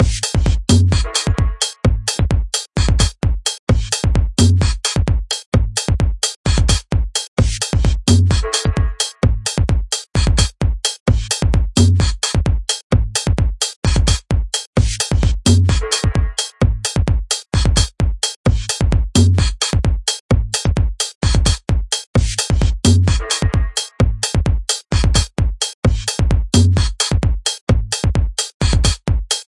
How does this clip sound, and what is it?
03 drumloop techno
Techno loop extracted from an Ableton project that I chose to discontinue. No fancy effects, just a simple drum pattern with some elements.
electronic, beat, drum, drum-loop, drums, percs, techno, loop